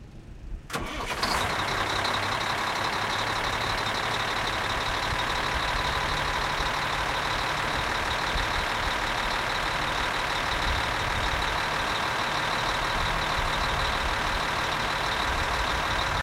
truck mack diesel semi engine close3 start
semi, engine, diesel